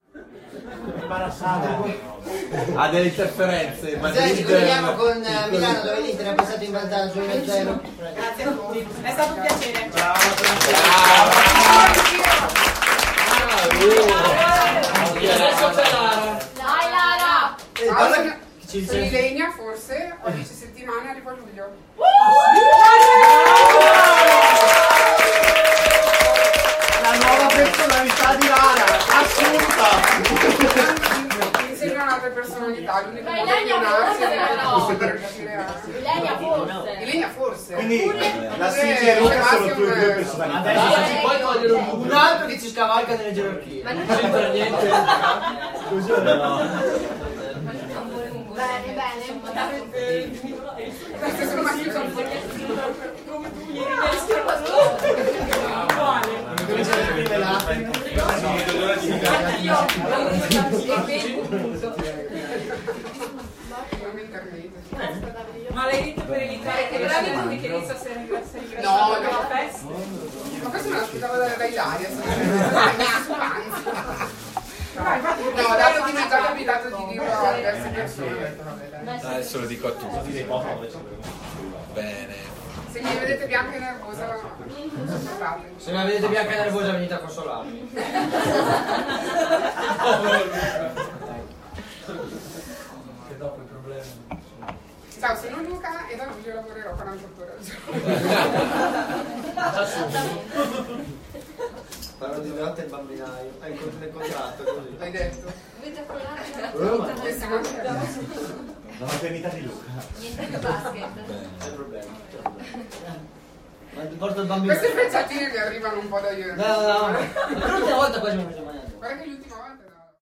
Crowd Small Place
place, crowd